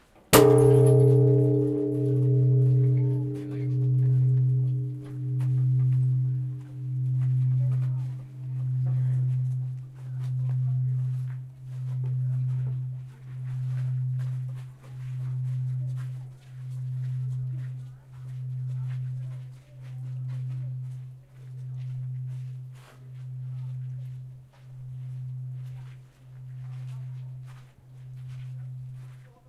Montbell (Bonshō) von Japan
Bonshō von Japan (large bell in the temples of the Mountains) recorded in March 2017 in Hida no Sato Village near Takayama.
Nice spectrum shape. My regret is that tourist ruined the take but it's fine.
Recorded with Zoom H4n, front microphone
hit, religion, impact, ringing, asia, japan, gong, strike, clanging, buddah, ring, percussion, iron, ting, metallic, clang, shintoism, metal, bong, steel, bell